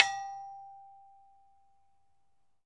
Hitting a gate made of metal pipes with a wooden rod once.